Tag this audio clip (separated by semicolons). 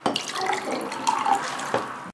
UPF-CS14; water; glass; campus-upf